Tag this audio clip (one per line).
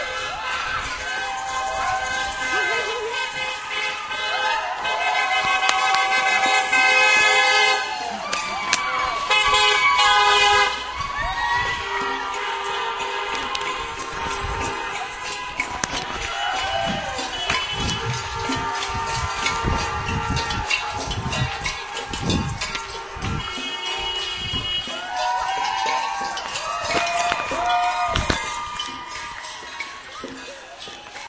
election-night
crowd-cheering
streets
field-recording